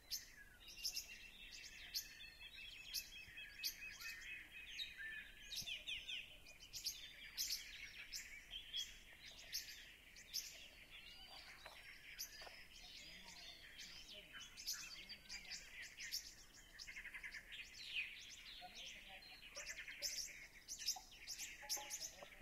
Rural Sound - Birds 3
| - Description - |
Ambient sound of birds singing in a very calm rural area